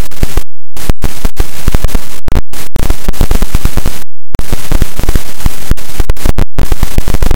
Part one out of who knows. I made this sound using an existing sound from my old tv, and a default static cut up into chunks from Audacity.